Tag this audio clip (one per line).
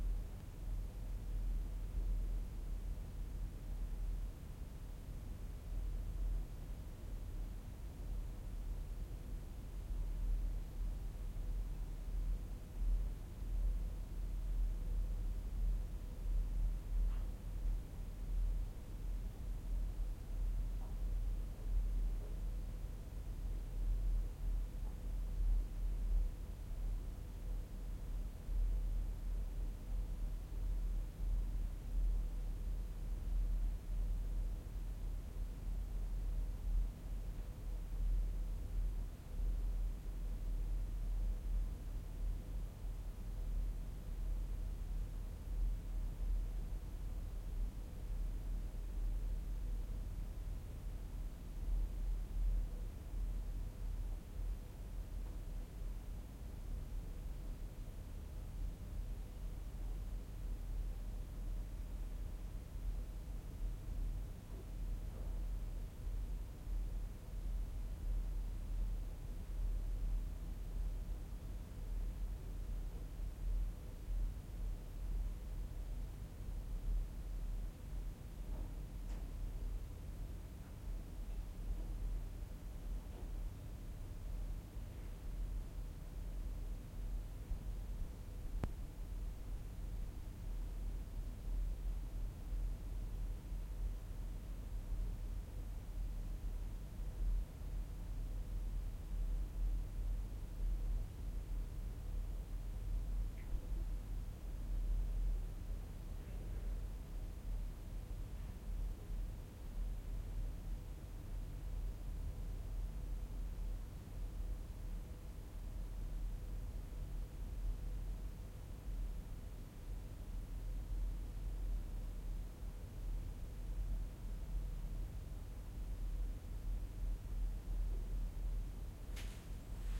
room
bit
inside
24
room-tone
background
ms
ambience
room-noise
tone
medium-room
roomtone
empty
decoded
atmos
stereo